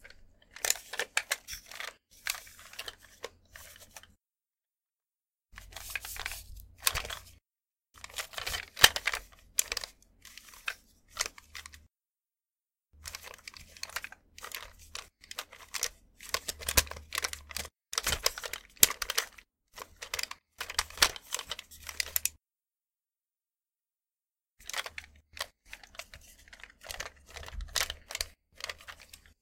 plastic toy foley
me messing around and making sounds with a plastic toy. recorded at my desk.
foley, hit, plastic, rustling, toy